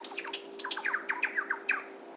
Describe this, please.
Short bird thrill. Soundman OKM capsules into FEL Microphone Amplifier BMA2, PCM-M10 recorder. Recorded on Puerto Iguazú (Misiones, Argentina)